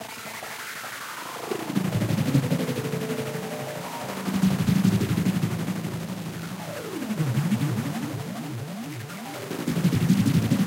apoteg loop03
ancient bits of sound I had rendered a long time ago for a friend's music project I secretly collaborated on ;)
These are old programmed synthesizers with heavy effects, each one slightly different. And they're perfectly loopable if you want!